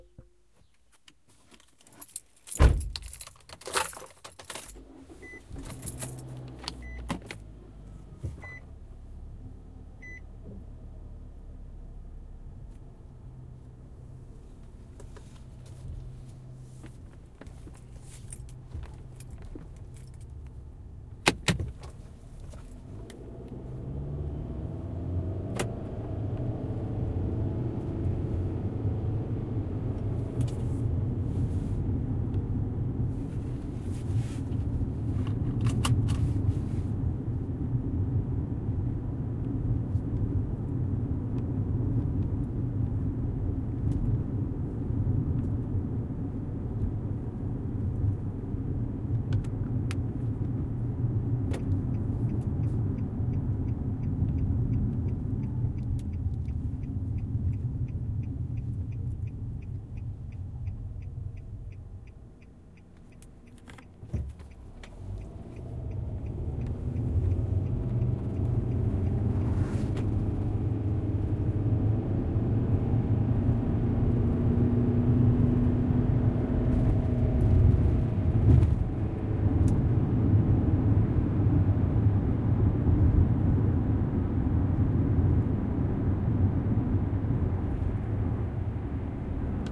CarInterior Start AutoTransX Turn
Sounds of car interior during first portion of a trip. Its a Nissan Rogue, and you might notice the CVT. Recorded with just an H4n on the seat. Extremely boring. Rough guide:
0:00 - 0:10 Entry & Ignition
0:10 - 0:20 Back out of garage into street
0:21 Automatic door locks
0:22 - 1:04 First road segment, approx 25 mph
0:36 Seat belt click
0:52 - 1:04 Turn signal and Decelerate to Stop
1:05 - end Second road segment, approx 45 mph
1:18 Bump of crossing over a bridge